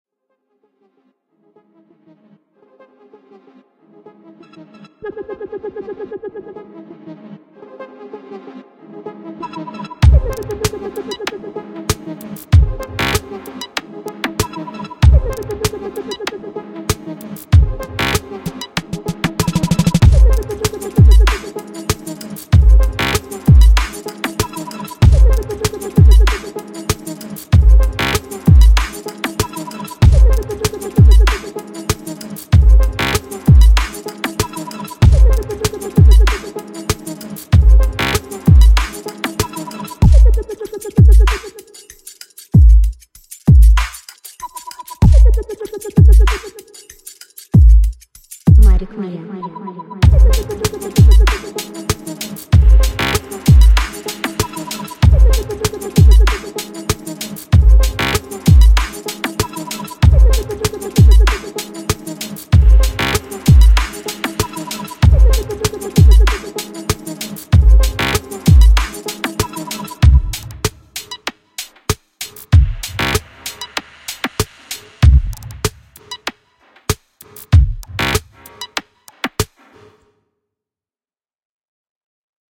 Beat LOOP for youre creativity of MUSIC
music, rhythmic